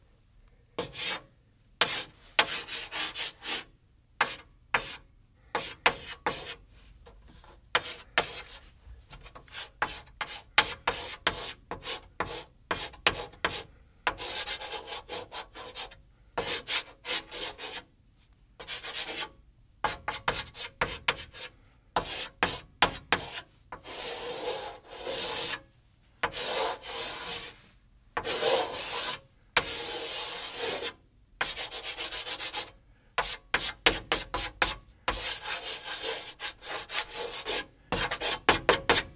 Chalk writing blackboard CsG
blackboard, writing